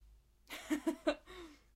Female Laugh 4
Recording is fun, some of the lighter moments extracted from vocal takes (singing). Recording chain Rode NT1-A (mic) etc...